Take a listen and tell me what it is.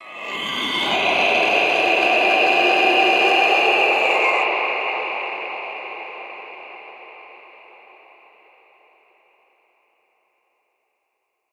2. of 4 Monster Screams (Dry and with Reverb)
Monster Scream 2 WET
wet, Huge, Growl, Spooky, Film, Scream, Roar, Movie, Creepy, Sounddesign, Sci-Fi, High, Fantasy, Mystery, Horror, Atmosphere, Scary, pitch, Game, Creature, Eerie, Strange, Effect, Sound-Design, Sound, Reverb, Monster